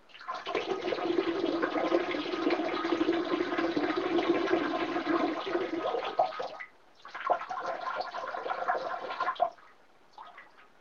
man urinating in toilet. recorded with low-fi digital voice recorder
bathroom, urinating